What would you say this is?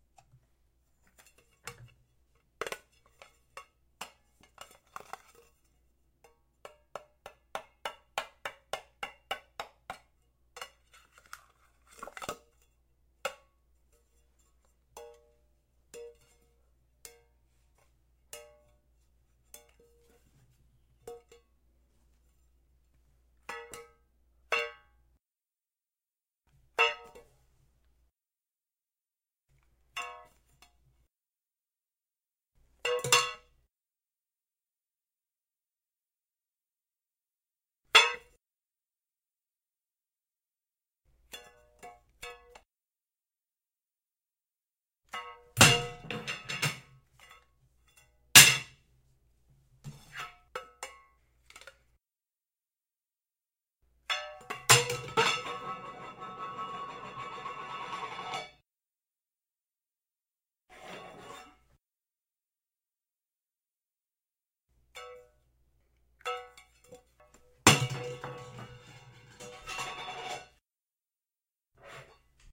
Weird sounds from a small tin container